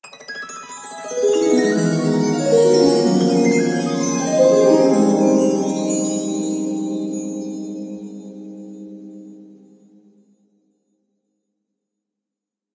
Flying butterfly sound for theatre with harp an chimes.